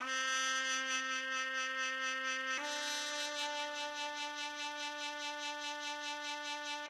Marcato Trupet
Some quick orchestra peices I did I broke it down peice
by piece just add a romantic pad and there you go, or build them and
then make the rest of the symphony with some voices and some beatz..... I miss heroin....... Bad for you....... Hope you like them........ They are Russian.
melody, love, ambient, space, orchestra, happiness